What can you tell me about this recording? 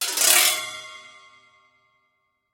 mar.gliss.resbars.updn1
Sample of marimba resonance pipes stroked by various mallets and sticks.
gliss, marimba, pipes, resonance